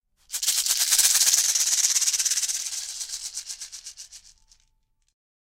NATIVE SHAKER 03
A native north-American shaker such as those used for ceremonial purposes i.e.; the sweat lodge.
ethnic, indigenous, indian, first-nations, hand, native, shaker, aboriginal, north-american, percussion